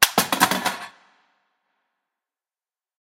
M4 Mag Table Drop
A 30 rd magazine being ejected then dropped onto a table.
Gun-FX, M4